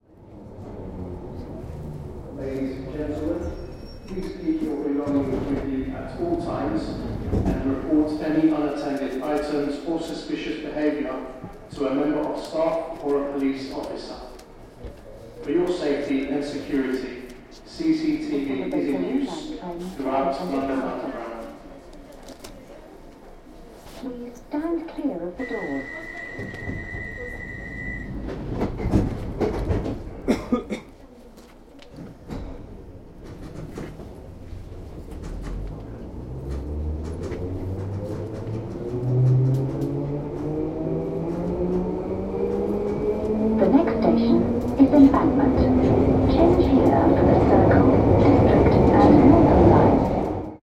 130405 london victoriatubestation traininterior
interior atmo of train leaving the victoria tube station in london, heading to green park.
recorded with a zoom h-2, mics set to 90° dispersion.
underground, london, train, tube, station, field-recording, transport, public, commuter